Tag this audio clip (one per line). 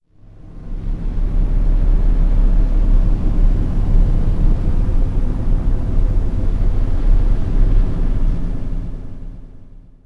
synthesized
ambient
storm
wind
night-time